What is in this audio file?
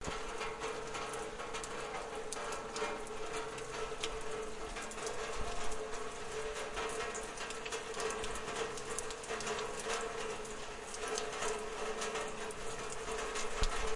Rain Tropfen Stark Strong Stark
Rain, Strong, Tropfen, Stark